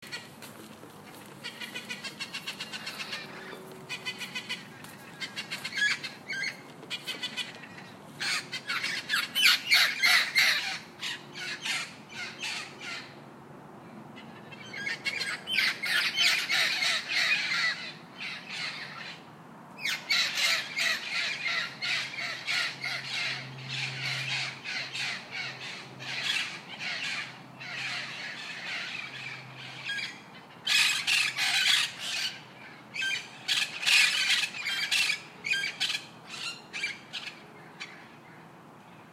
Parrots chirping in the tree tops.
jungle, rainforest, field-recording, exotic, birds, zoo, tropical, parrot, parrots, aviary, bird